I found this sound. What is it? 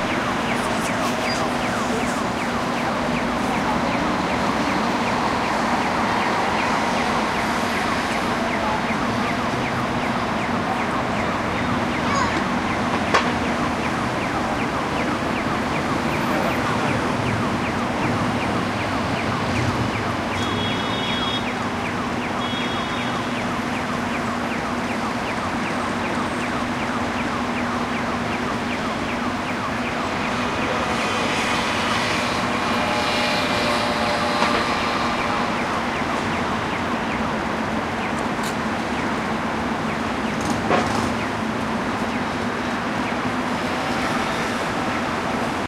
20100129.cibeles.crossing
ambiance at a pedestrian crossing in Cibeles, Madrid. You can hear the acoustic signal for pedestrians, car horns, some voices... Olympus LS10 internal mics
traffic, city-noise, field-recording